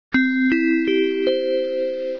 202D293D4D9FA1EC2D97D5
ring bell school ding dong dang dong
bell, ring, dang, dong, ding, school